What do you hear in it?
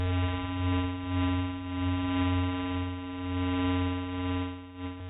VALENTIN Alexis 2015 2016 cyber-mosquito
A 300 Hz frequency sound has been generated at the beginning. As a weird sound, it could be used in a fantasy project as a video game or a sci-fi film.
mosquito, wings, droid, metallic, virtual, flying, revolving, cyber, insect, bug